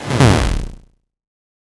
Stab fx for hardstyle, house
Created with audacity
electro, fx, hardstyle, hit, house, stab